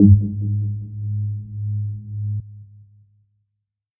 Big bass sound, with very short attack and big low end. High frequencies get very thin... All done on my Virus TI. Sequencing done within Cubase 5, audio editing within Wavelab 6.